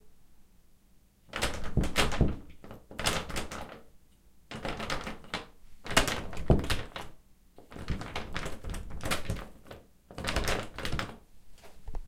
SFX for a door being locked, door handle jiggling